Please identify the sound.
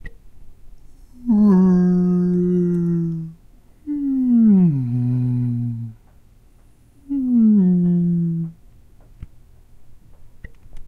This is a sound of a person trying to replicate a whale. This was recorded in a room with concrete walls. This was recorded in a tascam dr-40. This was edited on Reaper media.